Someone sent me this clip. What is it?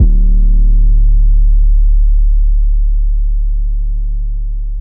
100 Distorto Drums Oneshot Kick 01
Distorto One-shot Kick 01
01, Kick, One-shot, Distorto